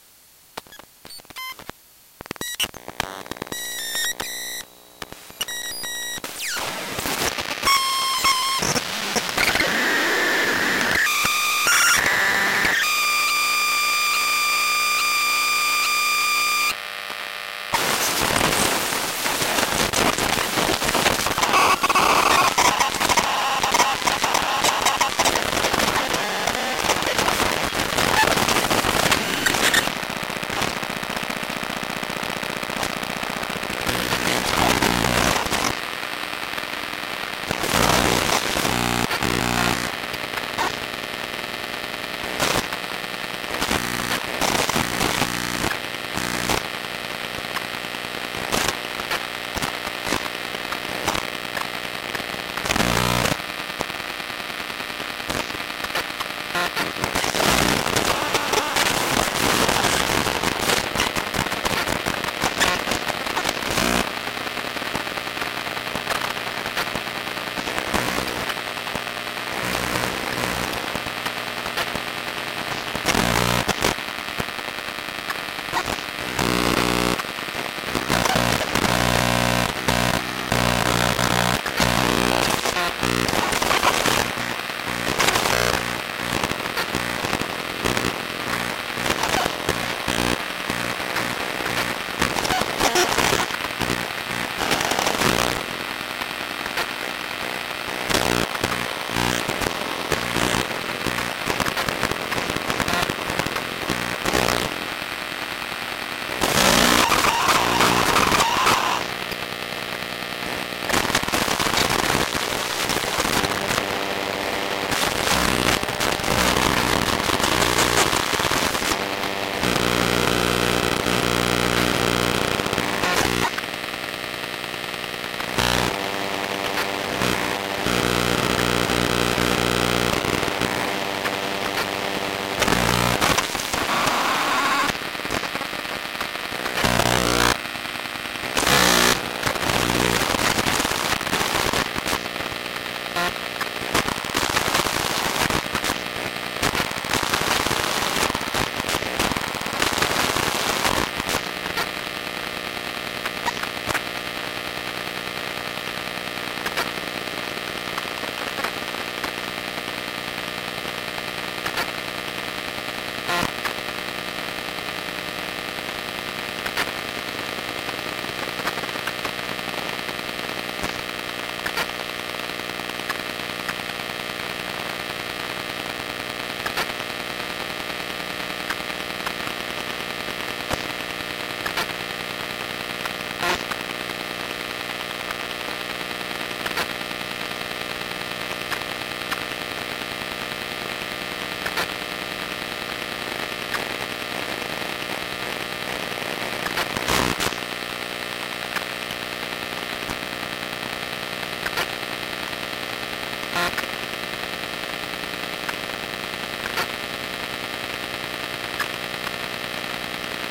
iPad startup and idle
recorded with induction coil
induction-coil, mic, emf